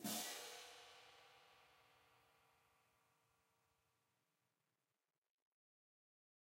Marching Hand Cymbal Pair Volume 02
This sample is part of a multi-velocity pack recording of a pair of marching hand cymbals clashed together.
band, cymbals, orchestral, symphonic